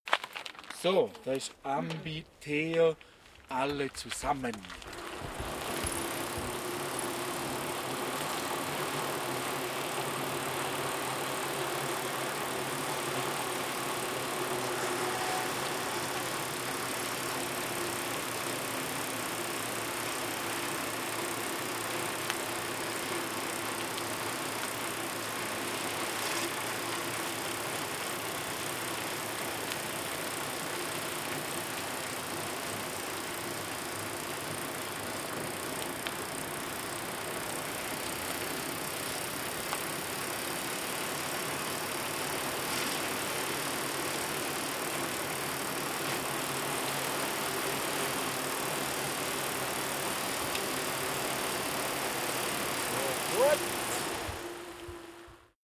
4 Bikes downwards MS
Original MS-recording of 4 bikes downhilling a road in the mountains.
bike, downhill, fieldrecording, wheel